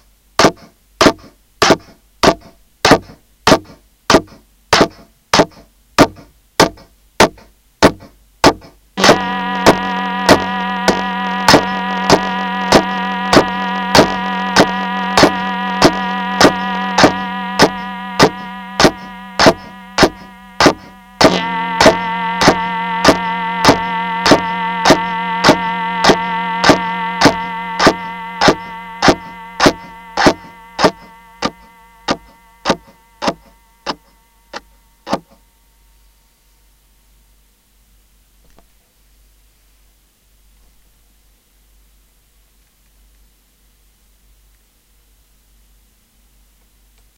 small, short sounds that can be used for composing...anything